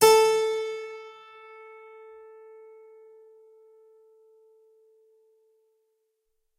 Harpsichord recorded with overhead mics